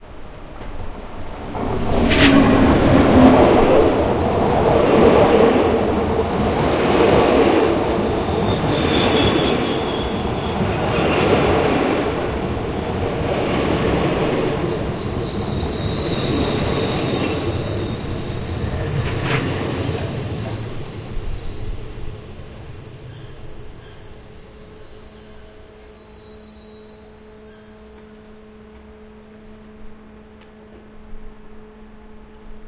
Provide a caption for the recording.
A train stopping at a calm station, passing by the mic.
{"fr":"Arret d'un train","desc":"Un train arrive dans une gare calme.","tags":"train arret gare stop"}
by, bypass, passing, station, stopping, train